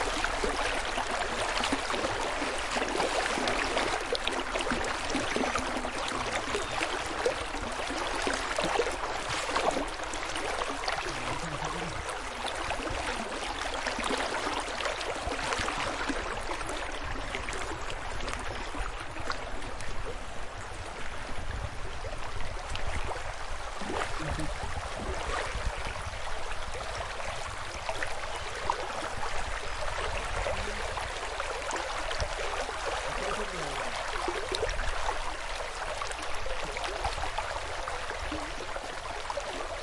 Sounds of rural area - river 2
| - Description - |
Water flowing down a small river